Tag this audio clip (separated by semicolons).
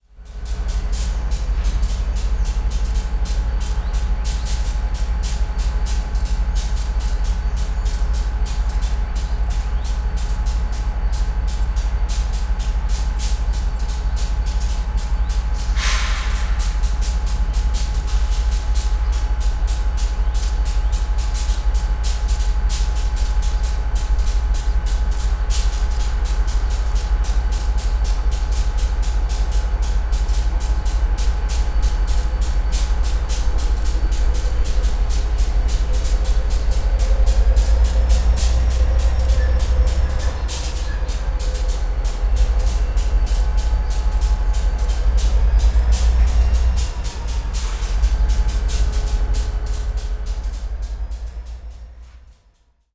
engine; field-recording; locomotive; machine; sound-effect; train